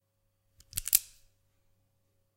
Cocking a revolver
Cocking a small revolver